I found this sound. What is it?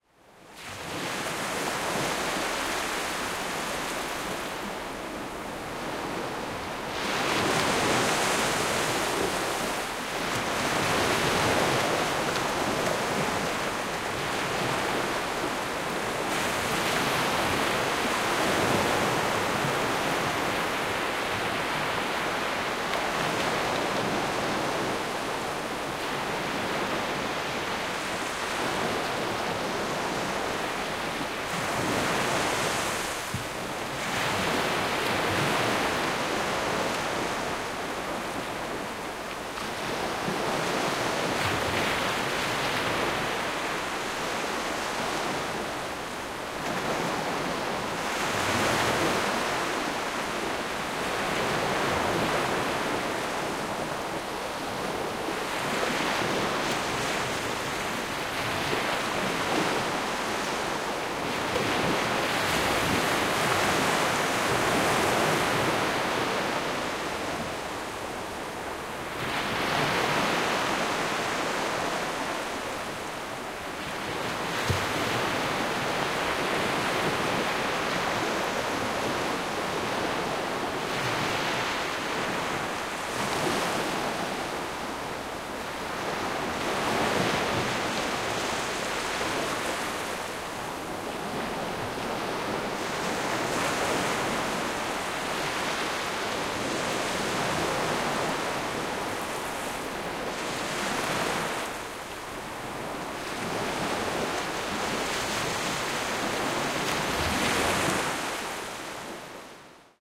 Busy gentle waves
Busy calm waves crashing on a beach with a hint of fizzy bubbles. Recorded in Walton-on-the-Naze, Essex, UK. Recorded with a Zoom H5 MSH-6 stereo mic on a calm winter evening.
bubbles, fizzing, ocean